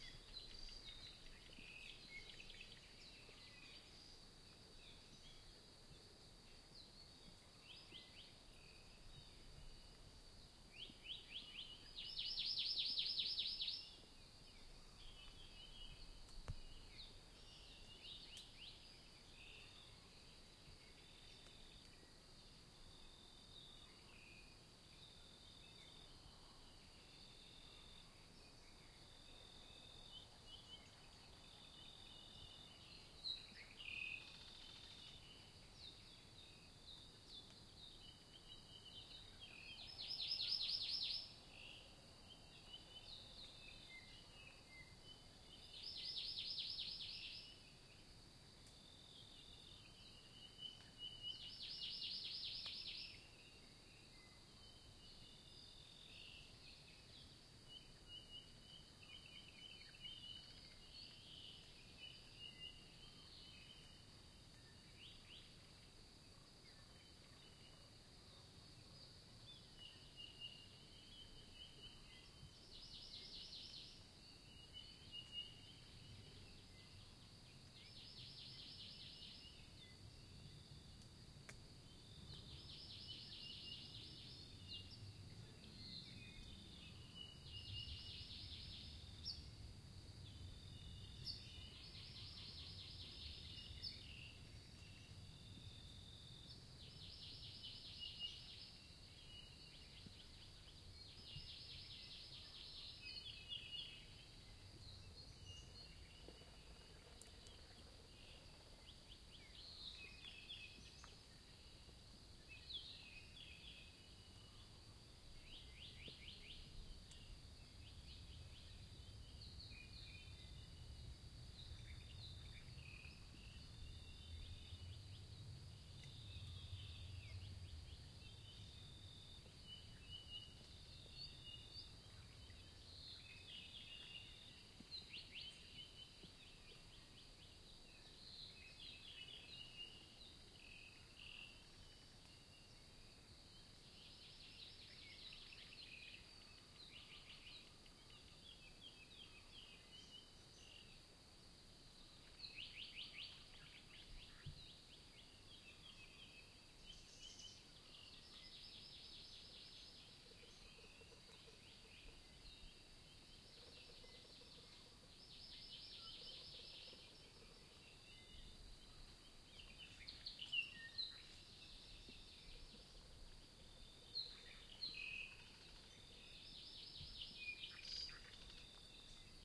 Cloud Forest type rainforest, with a few birds, in the Kona Mountains, Big Island, Hawaii, USA. End of spring 2017.